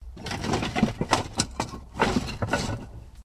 Falling logs 03
Falling logs in a woodshed
Recorded with digital recorder and processed with Audacity